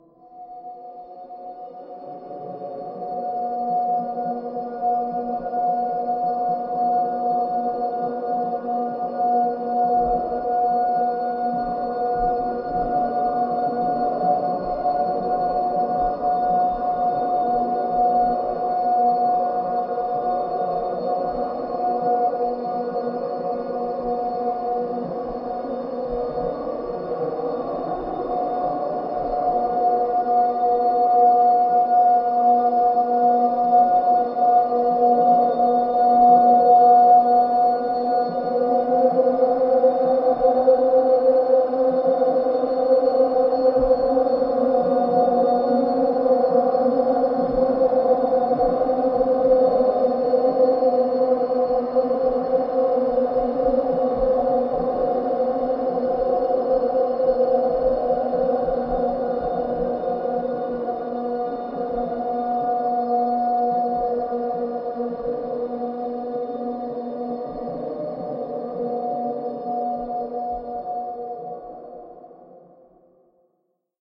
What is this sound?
LAYERS 023 - Industrial drone-92
I took for this sound 4 different machine sounds: a wood milling machine, a heavy bulldozer sound, a drilling machine and some heavy beating sounds with a hammer. I convoluted the four sounds to create one single drone of over one minute long. I placed this sound within Kontakt 4 and used the time machine 2 mode to pitch the sound and there you have the Industrial drone layer sound. A mellow drone like soundscape... suitable as background noise. Created within Cubase 5.
multisample, soundscape, industrial, drone, background